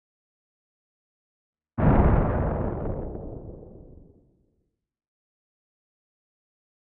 Synthesized Thunder 05
Synthesized using a Korg microKorg
thunder, synthesis